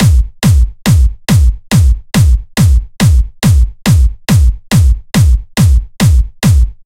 Another Kick Loop made in FL.